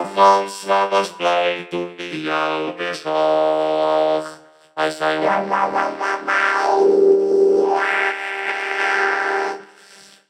derived from a song about Francois, he is someones cat :)
vocoder, funny